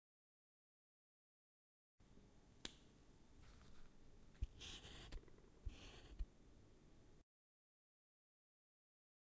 writing., close perpective., int.
PRAGUE, PANSKA, CZ